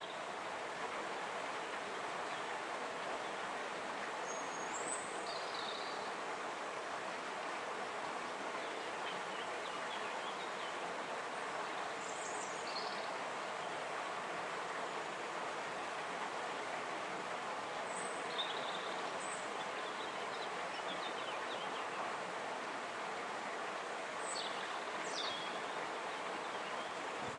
01 water birds
field recording little processed in post, location is canyon of river Rjecina (mill Zakalj) near town Rijeka in Croatia
birds, canyon, field, rjecina, water